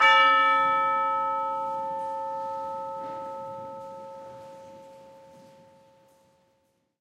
TUBULAR BELL STRIKE 004
This sample pack contains ten samples of a standard orchestral tubular bell playing the note A. This was recorded live at 3rd Avenue United Church in Saskatoon, Saskatchewan, Canada on the 27th of November 2009 by Dr. David Puls. NB: There is a live audience present and thus there are sounds of movement, coughing and so on in the background. The close mic was the front capsule of a Josephson C720 through an API 3124+ preamp whilst the more ambient partials of the source were captured with various microphones placed around the church. Recorded to an Alesis HD24 then downloaded into Pro Tools. Final edit in Cool Edit Pro.